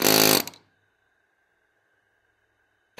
Pneumatic chisel hammer - Atlas Copco rrc 22f - Start 1
Atlas copco rrc 22f pneumatic chisel hammer started once.